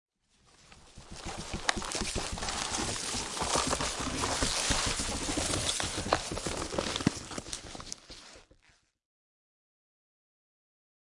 Designed sound intended to be a medium sized plant growing. Created layering real lettuce and chard, foam and dry leaves rustling.
Created by the students of the Sound Design Workshop from the National School of Arts of Uruguay, at Playa Hermosa.
Zoom F8n
Sennheiser MK50
brach, bush, creak, grow, growing, leaf, leafs, leaves, plant, tree, turn, twist